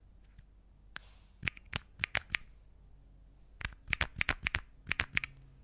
The sound of a glass container with a push to squeeze out function being used.